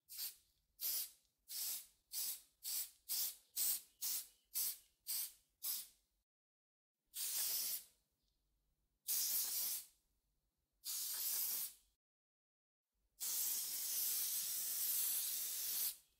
spraying a muffin pan with spray and cook.
used short bursts medium bursts and 1 long spray